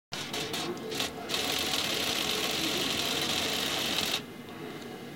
I heard this sound coming out of bank machine at college, so why not recording it and edit? :D